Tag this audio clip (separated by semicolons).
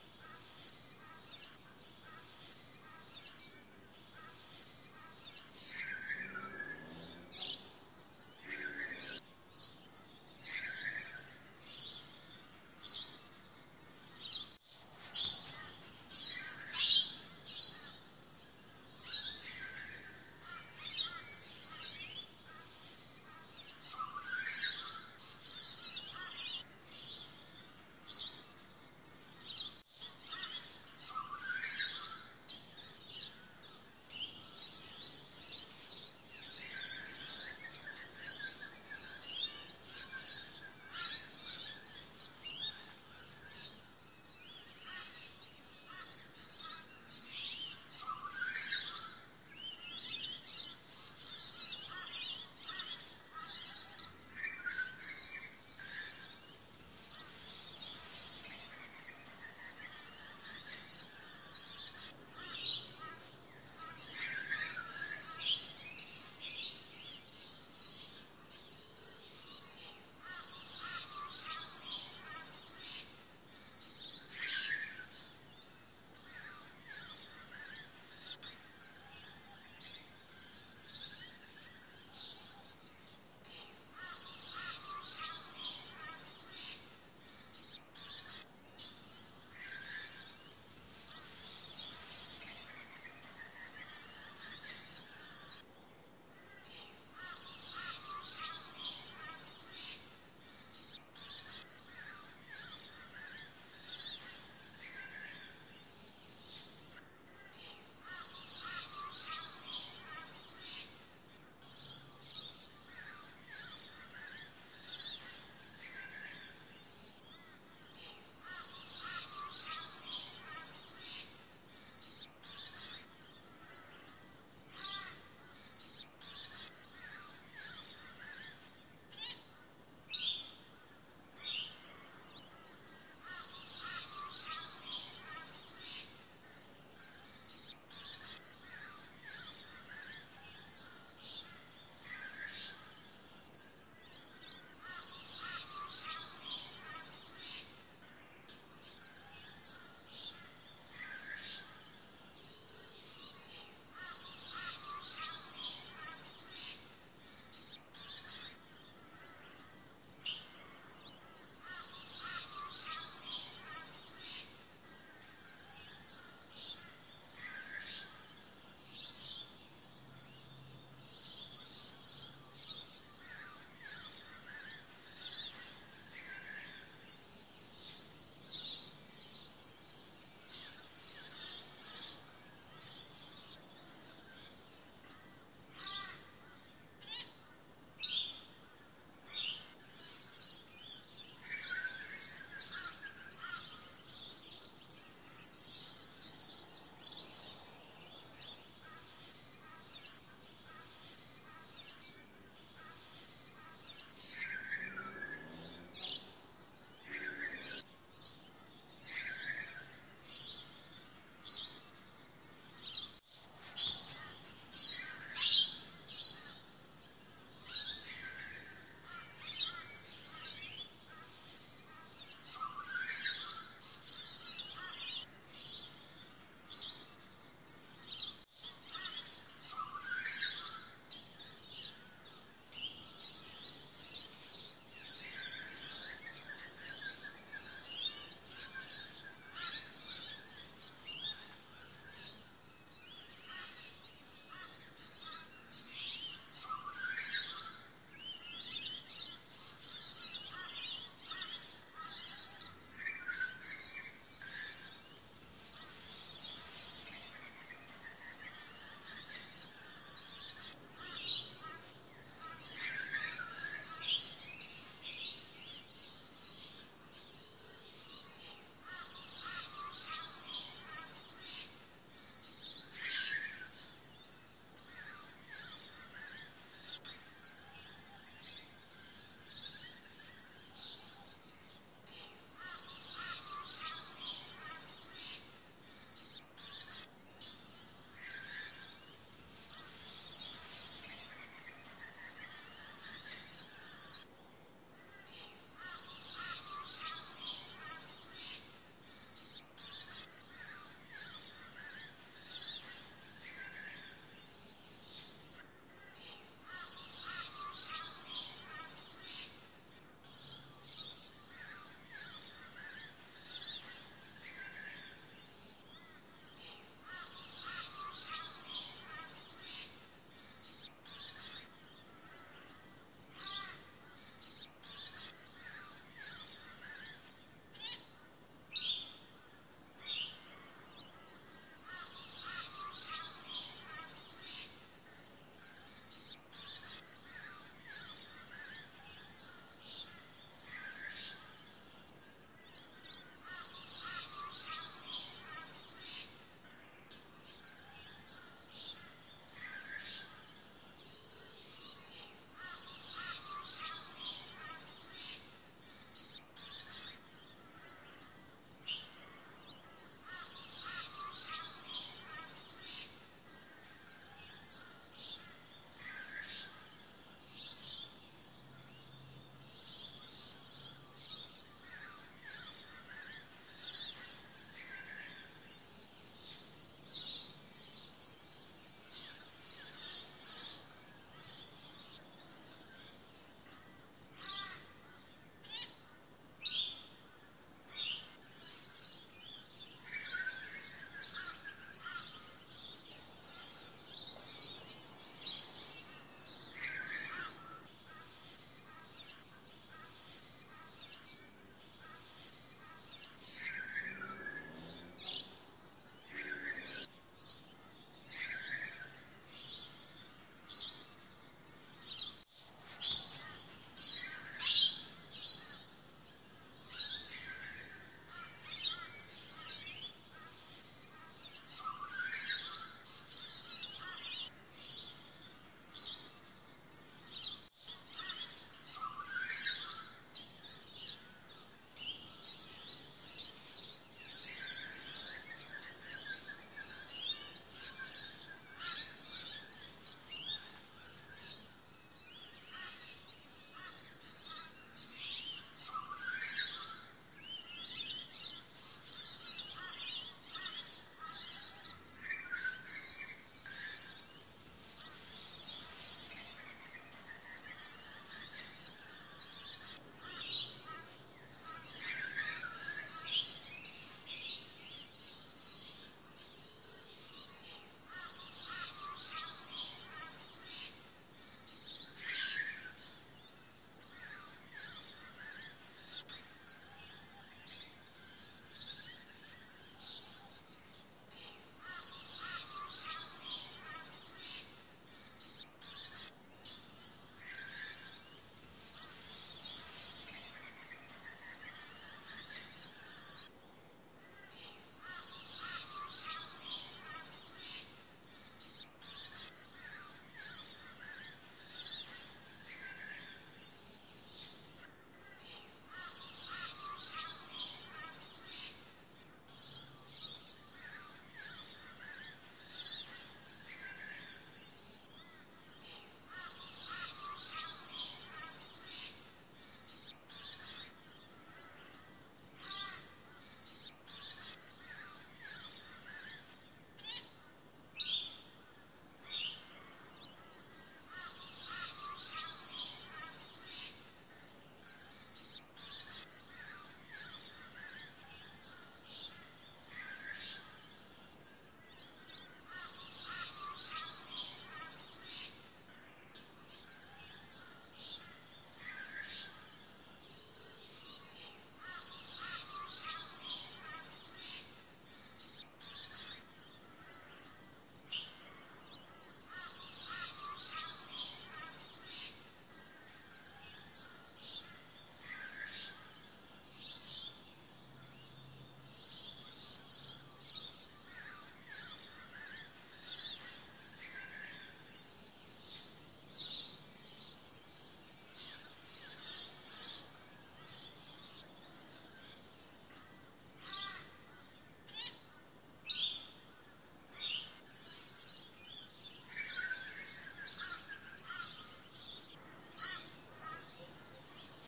Australia; Birds; Country; Nature; Sunrise